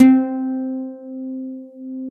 Looped, nylon string guitar note
single-notes, acoustic, guitar, nylon-guitar